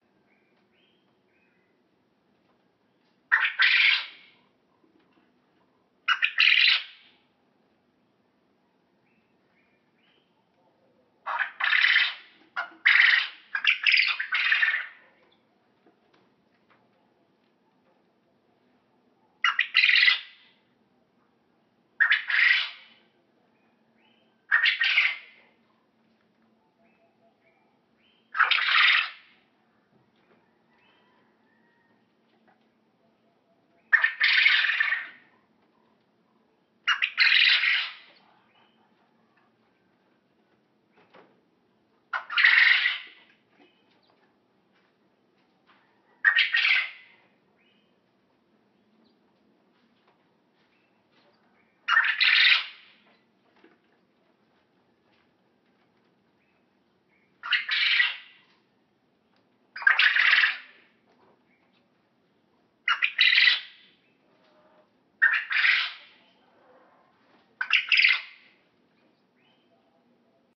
Quail Sound
Recorded at Santoe _ Ghana. Hearing little birds background.